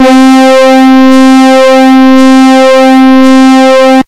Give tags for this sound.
casio; magicalligth

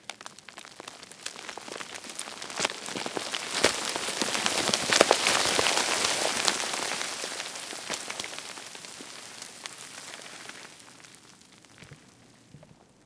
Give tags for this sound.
avalanche; destruction; fall; rock; rubble; slide; stone

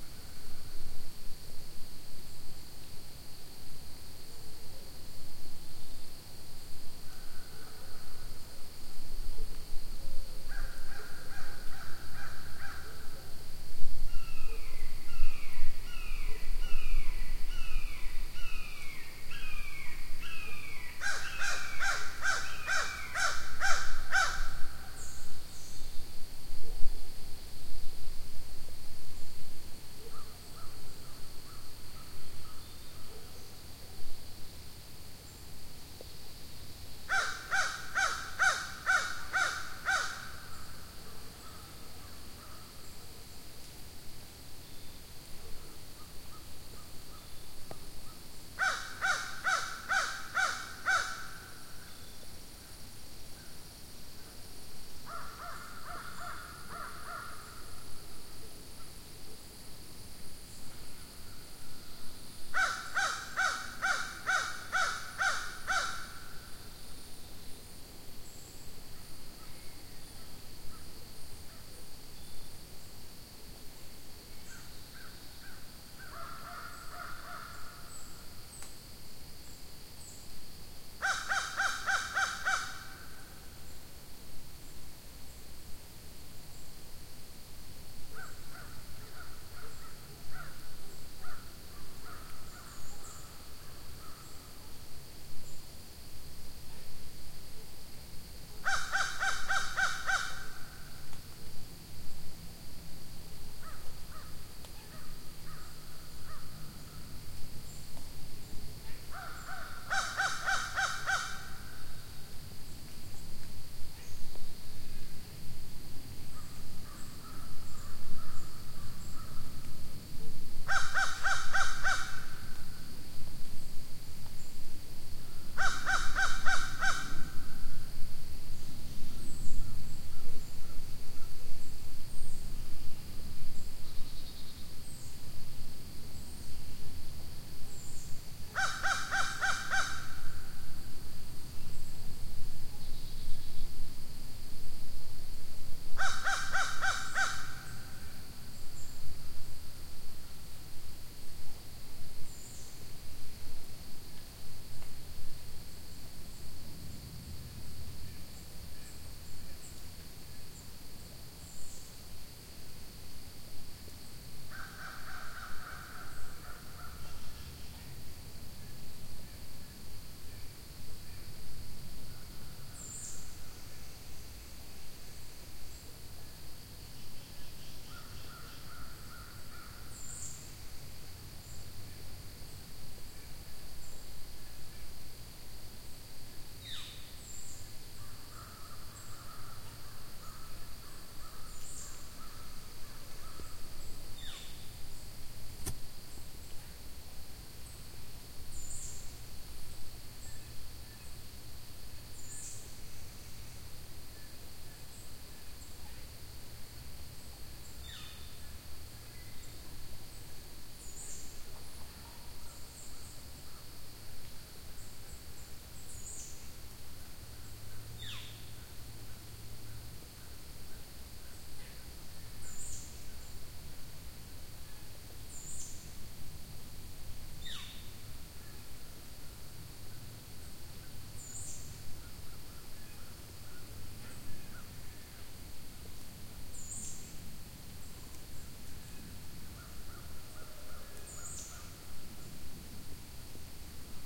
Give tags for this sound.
ambiance; ambience; birds; breeze

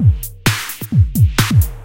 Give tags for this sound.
trance; electronica; drum; kick

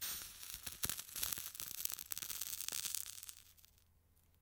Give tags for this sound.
burn; burning; cigarette; crackle; extinguished; fire; flame; fuse; match; sizzle; spark; sparks

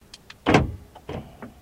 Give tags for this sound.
locking; button; lock; click; car; key

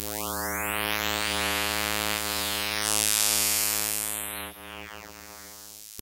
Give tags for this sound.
Image; Remix; Soundeffect

